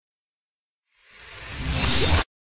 Laser Sword Turn Off 1
Sci-fi laser sword sound effects that I created.
To record the hissing sound that I added in the sound effect, I ran the bottom of a cooking pan under some water, and then placed it on a hot stove. The water hissed as soon as it hit the hot stove top, making the hissing sound.
Hope you enjoy the sound effects!